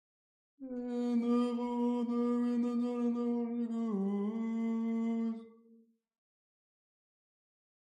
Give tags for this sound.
chanting; church; various

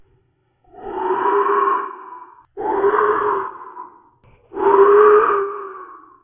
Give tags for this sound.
creepy; storm; weather; Wind; windy

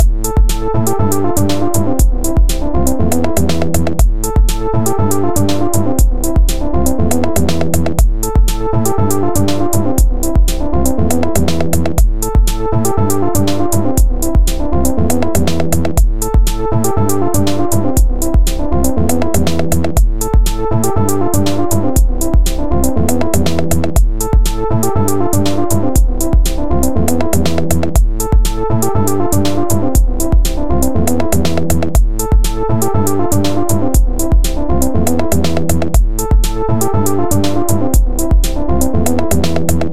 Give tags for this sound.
discovery; electronic; loop; mystery; suspense

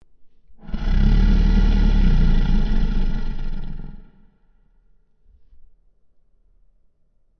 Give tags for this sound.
Creature; Growl; Horror; Monster; Scary; Zombie

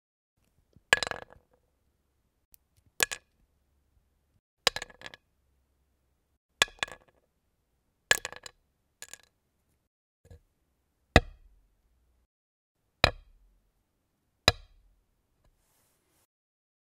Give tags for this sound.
close-up; impact; wood

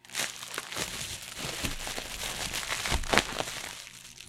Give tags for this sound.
noise; plastic; ruffle

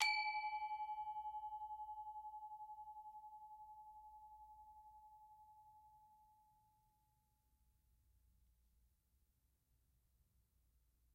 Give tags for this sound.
gamelan; percussion; bali

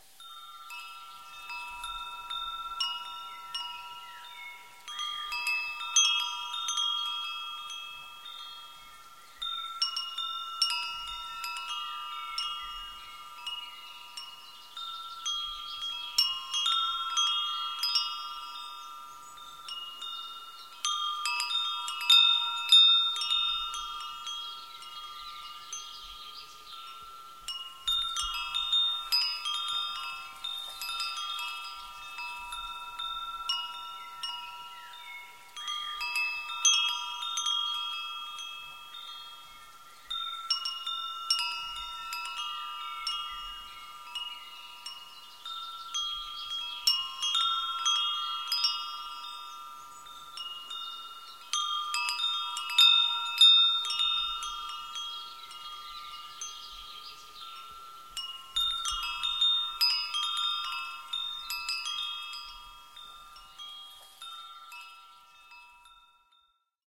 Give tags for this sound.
birdsong; chimes; windchimes

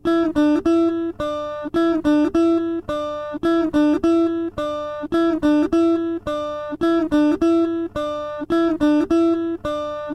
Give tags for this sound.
acoustic; doubled; doubling; guitar; notes; panned; yamaha

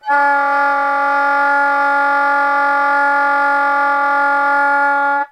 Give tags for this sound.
multiphonics; sax; saxophone; soprano-sax

